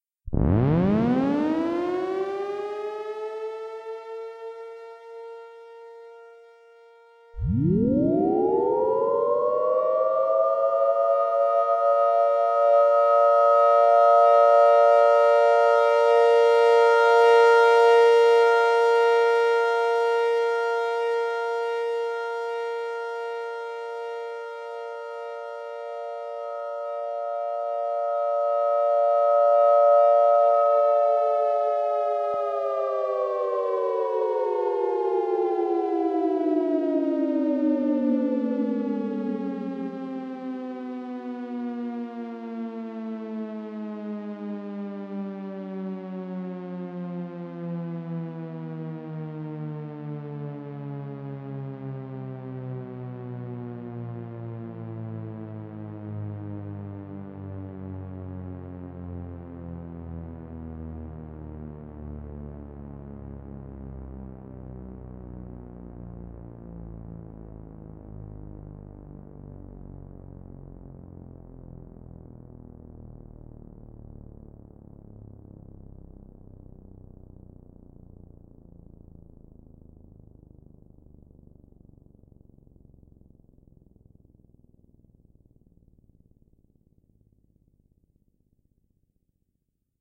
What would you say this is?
This is a really fast Synth of a Sentry Defender and a Thunderbolt 1000T both in 30 second alert. This is my first try at a Sentry Defender so please dont post negative comments. Soon there will be an outburst of Synths as i haven't filled requests in a while.
-Siren Boy
-MSS & More Team